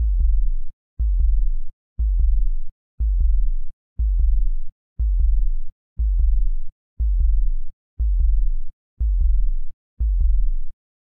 Heartbeat (60 BPM)
Synthesised Heartbeat consisting of Bass Sweeps.